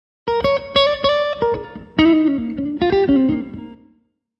Jazz guitar #6 109bpm
A blues line played on guitar key of Bb
line, guitar, jazz